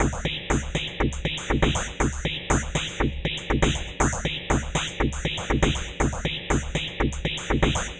A simple loop @ 120 bpm created using sounds from this pack by copyc4t.
Cut out small interesting portions of copyc4t's sounds in Audacity, applied a low cut filter to remove anything below 100Hz and, in some cases, a fade-out.
These tiny sounds were then loaded into ReDrum in Reason and created a simple pattern (only 3 sounds). Some of the sounds were sent through effects: Digital Reverb, Scream4Distortion bitcrusher, Aligator sequenced filter.
All the sounds used for this loop came from this sample by copyc4at